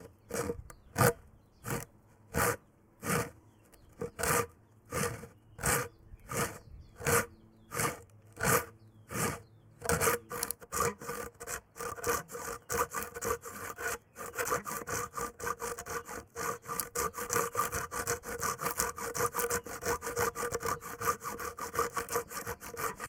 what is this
Sawing a piece of wood with a hand saw.